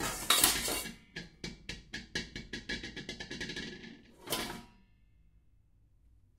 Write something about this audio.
pans banging around in a kitchen
recorded on 10 September 2009 using a Zoom H4 recorder
banging, pans, kitchen